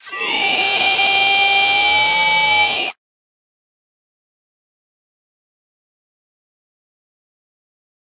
3. of 4 Monster Screams (Dry and with Reverb)
Monster Scream 3 DRY
Growl, Fantasy, Roar, Effect, Movie, Huge, Scary, Mystery, Sci-Fi, Creature, pitch, Atmosphere, Monster, Horror, Scream, Spooky, Eerie, Film, dry, Strange, Sounddesign, Sound-Design, Sound, Reverb, Game, High, Creepy